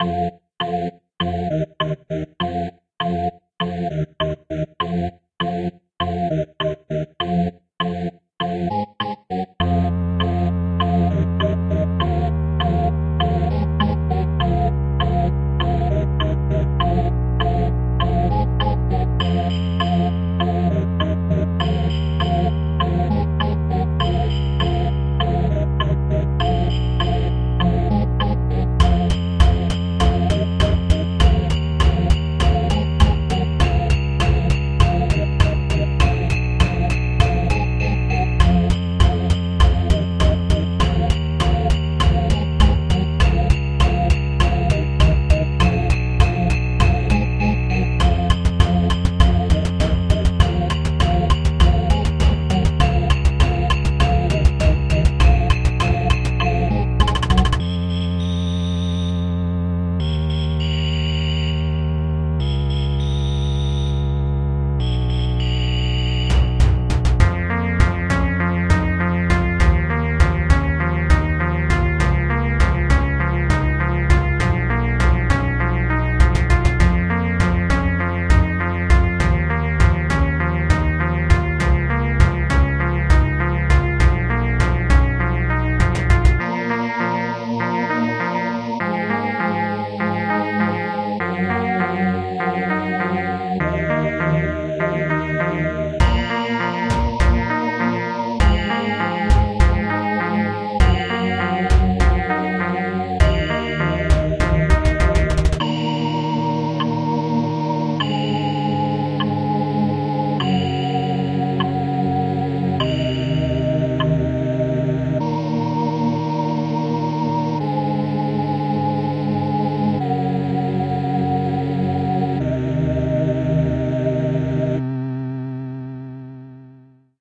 Calm & relaxing music #2
You can use this loop for any of your needs. Enjoy. Created in JummBox/BeepBox.
melody, calm, background, soundtrack, soothing, relaxing, peace, ost, atmosphere, peaceful, game, music, theme, slow, loop, sample